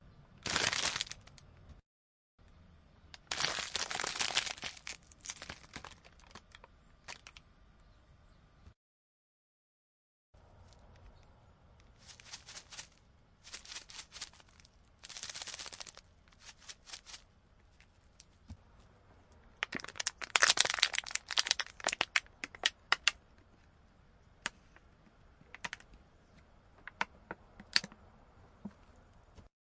Getting crunchy and crispy with my bare hands and an empty plastic Seaweed container from Trader Joes. Includes smashes and shaking Silica bag